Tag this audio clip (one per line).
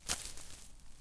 bracken
crisp
Dry-grass
footstep
grass
left-foot
steps
walk